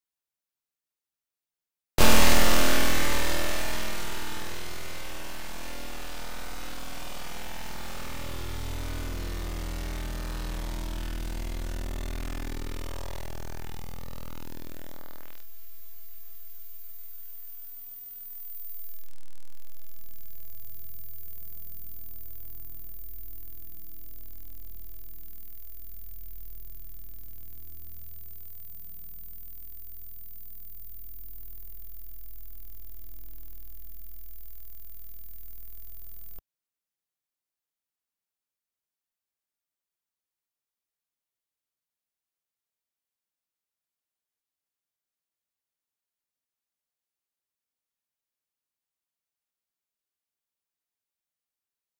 Sounds intended for a sound experiment.
derived from this sound:
Descriptions will be updated to show what processing was done to each sound, but only when the experiment is over.
To participate in the sound experiment:
a) listen to this sound and the original sound.
b) Consider which one sounds more unpleasant. Then enter a comment for this sound using the scores below.
c) You should enter a comment with one of the following scores:
1 - if the new sound is much more unpleasant than the original sound
2 - If the new sound is somewhat more unpleasant than the original sound
3 - If the sounds are equally unpleasant. If you cannot decide which sound is more unpleasant after listening to the sounds twice, then please choose this one.
4 - The original sound was more unpleasant
5 - The original sound was much more unplesant.
Dare-26, databending, image-to-sound, unpleasant, sound-experiment, experimental